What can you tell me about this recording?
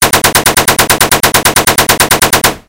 Assualt Rifle Shooting2
I created this sound with a small sample made by "pgi's" which I reused it multiple times right after another and changed the speed to create this amazing sound.